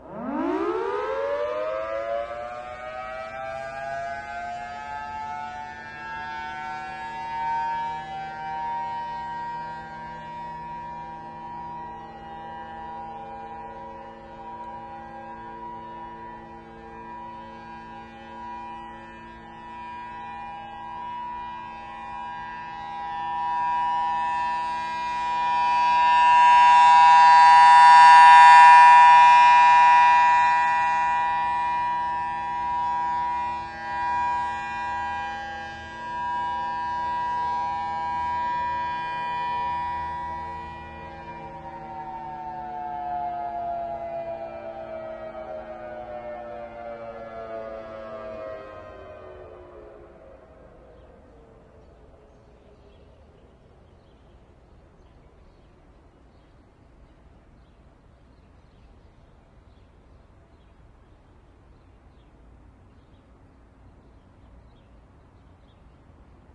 2-2-09 HonoluluMB 1000T-FS
February 2nd 2009 Monday. High pitched Thunderbolt 1000T on top of the Honolulu Municipal Building doing a 45 second alert test. Almost as high pitched as the Maunalua Bay recording I have. You can hear other Thunderbolt 1000Ts in the background, which are really ominous sounding.
defense, civil, warning, 1000t, disaster, federal, emergency, air, tornado, air-raid, outdoor, binaural, raid, signal, thunderbolt, siren